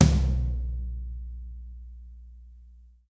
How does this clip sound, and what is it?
prac - tom
Recorded in a long hallway with two AKG C4000Bs as overheads and sm57s on everything else. No fake reverb!
drums, percussion, tom